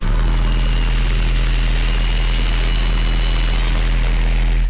Low Dark Sub Heavy Scarey Scared Digital Driller Sound Nova Drill Deadly Distorted Horror Evil Dark Drilling - Nova Sound
7, 7-bit, 8, 8-bit, Alien, Bugs, Cyber, Digita, Drill, ET, Extraterrestrial, Grind, Machine, Nova, NovaSound, Outer, Robot, Satelite, Satellite, Sound, Space, Spacey, Universal, bit